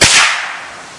This is the sound of a .177 Crosman Quest 1000 firing off my back porch.